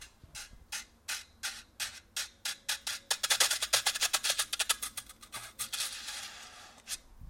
Slide and brush scrapes